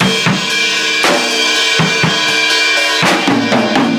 TAGS BEAT
Me playing a loop on my mate's drum kit. Recorded on an iphone.
beat, dirty, Distorted, drums, garage, loop, real, tape